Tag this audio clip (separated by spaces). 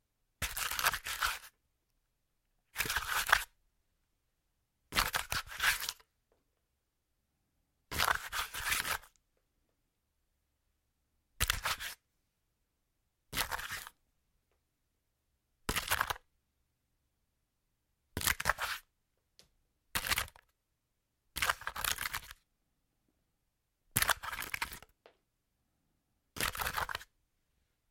bark harder-crackling interior tree wood